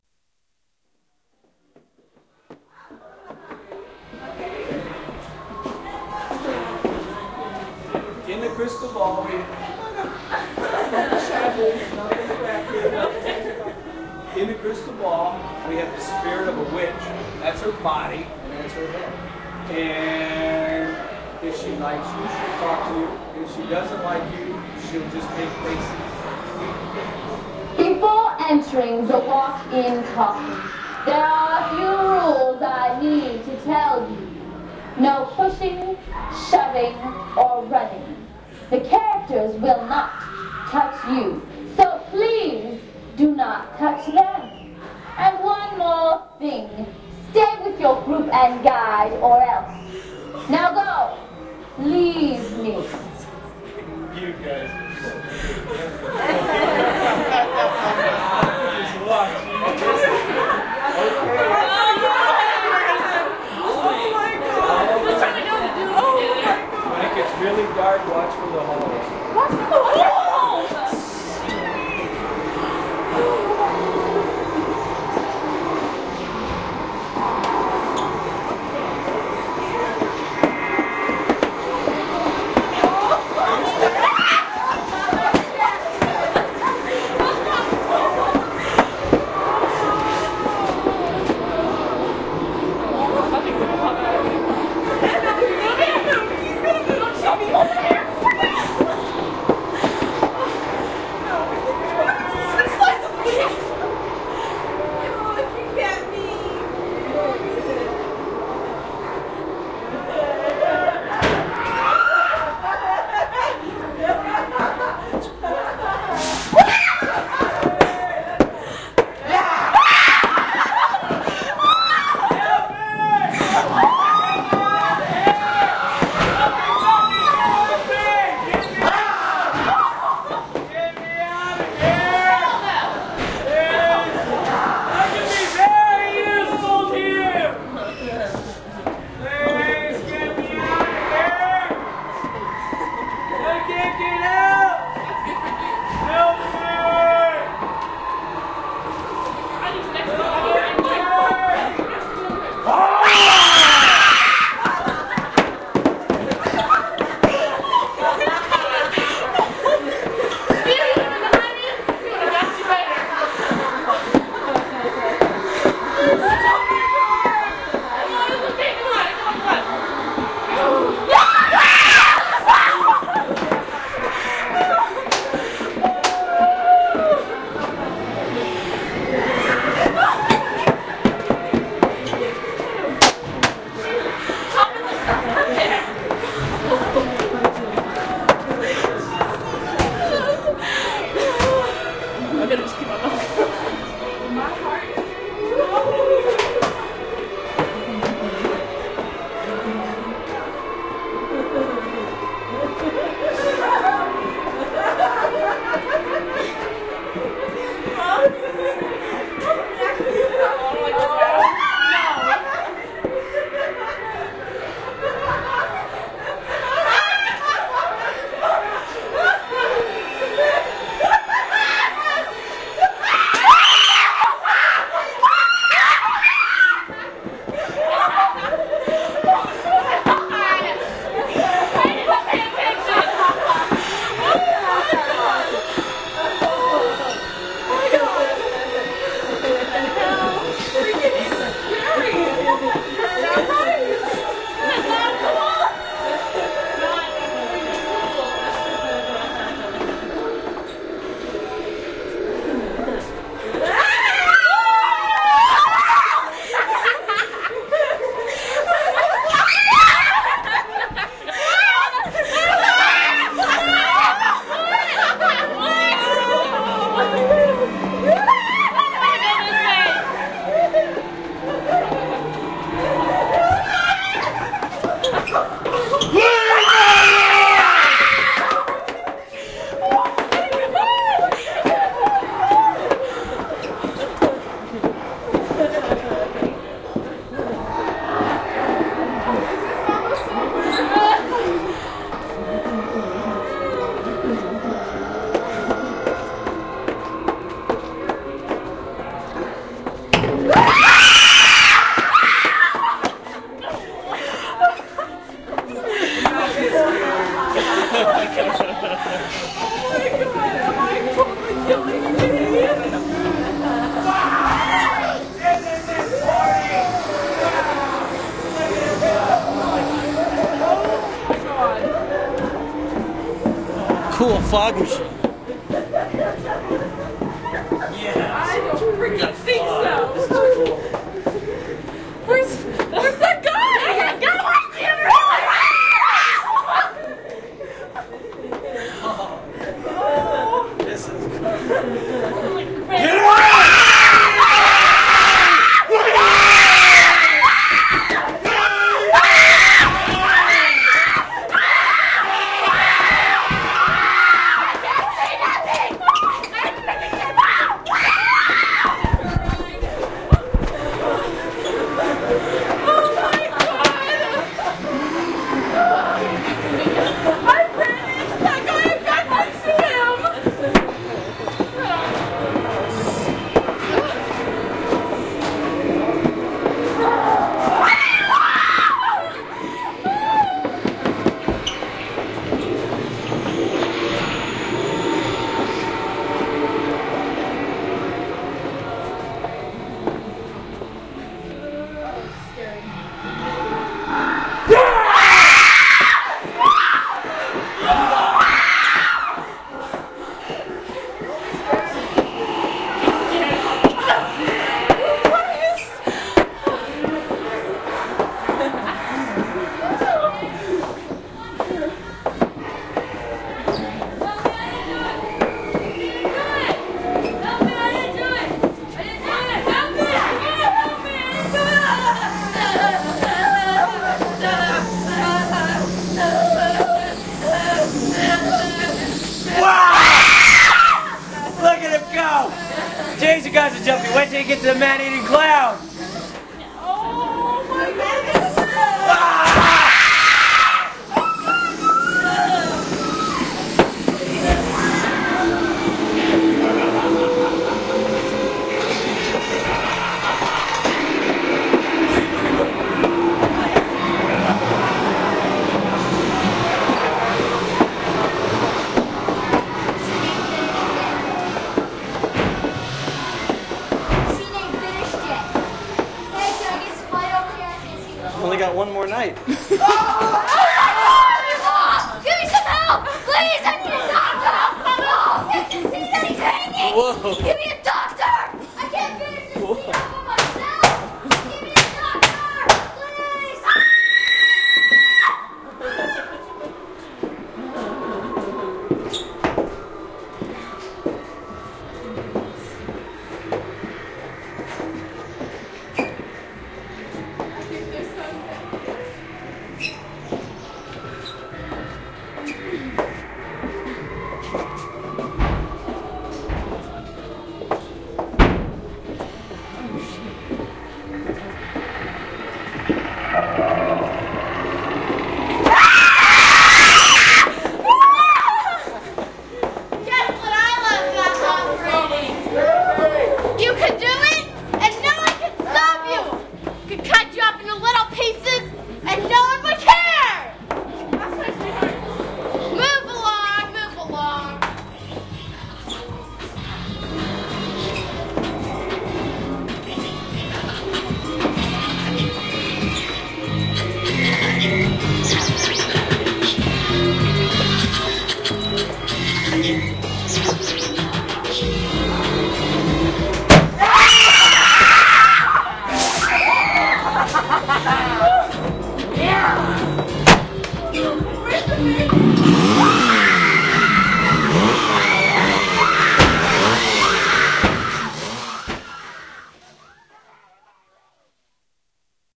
Haunted House Tour
A high quality stereo wave file recording of walking through a high end Haunted House with guests that REALLY scream! It was recorded using a Sony MD Recorder with a Sony ECM-MS907 Digital Microphone. This is a complete walkthough and is almost 10 min long! Girls have high pitched screams when jumped at. One of my favorite recordings!
fright, halloween, haunted, house, scary, scream, tour, walkthrough